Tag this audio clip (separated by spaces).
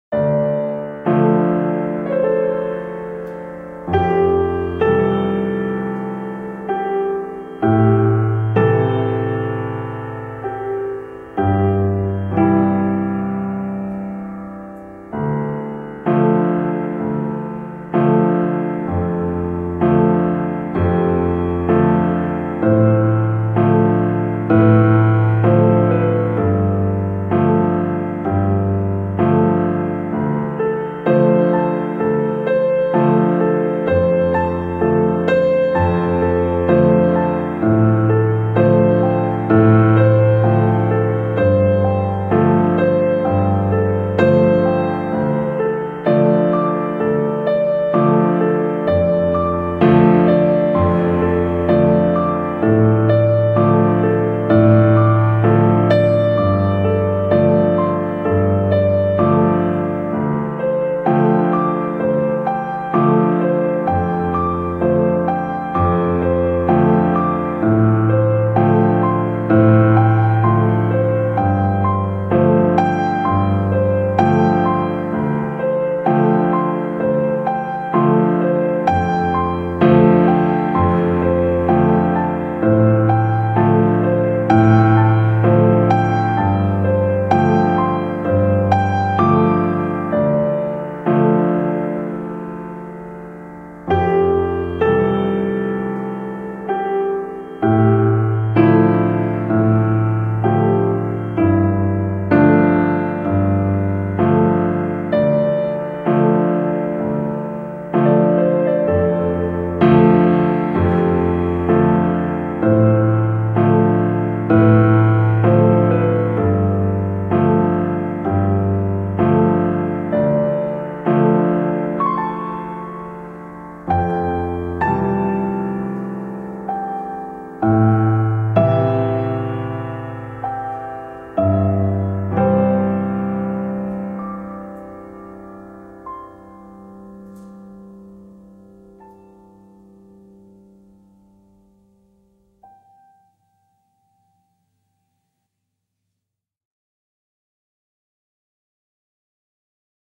field-recording dramatic boy atmosphere fl rain movie studio background-music rainy ambient pianino little film kid fortepiano cinematic ambience piano background loneliness day children background-sound drama reverb